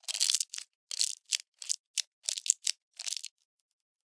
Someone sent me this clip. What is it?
candy wrapper bite chew A
biting into a plastic candy wrapper and then chewing. tastes like chicken!
bite, candy, chew, wrapper